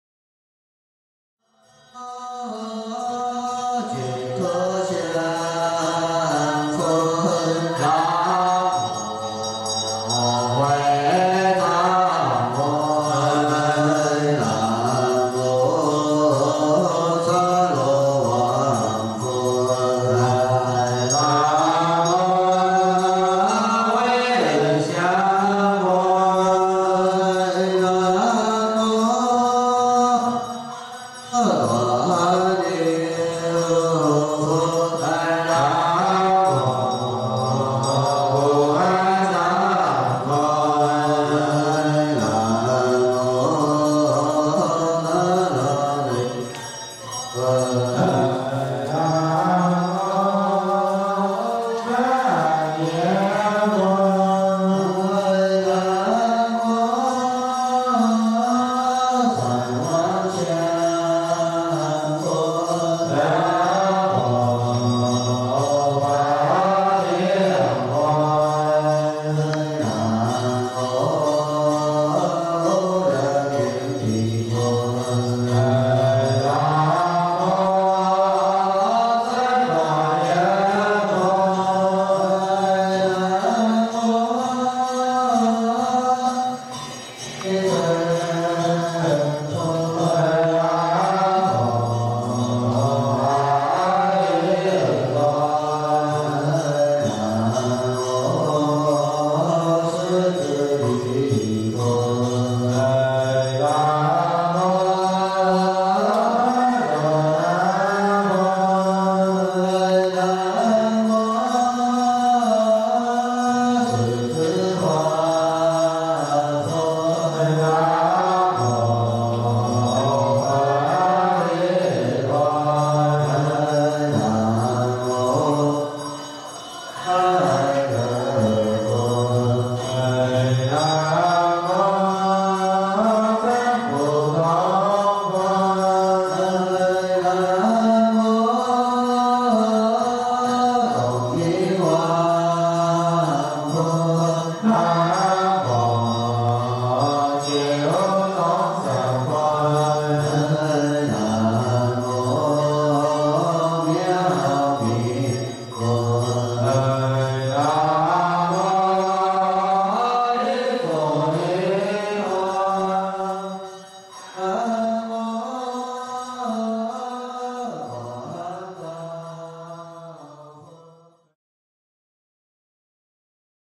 On a rainy morning I recorded this chant at the South Shaolin Temple in Fuzhou, China. Recorded on March 23, 2016.
► Was this sound useful? How about a coffee.